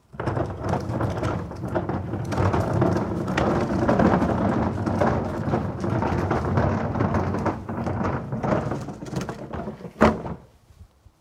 Recycle Bin Roll Stop Plastic Wheel Cement 3
cement, roll